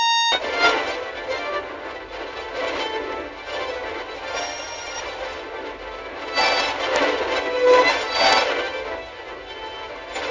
Neural Network Violin 8
Audio generated from training a neural network on violin sounds.